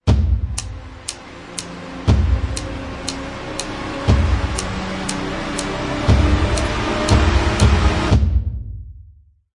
AliceIntro(No Bells)
alice, wonderland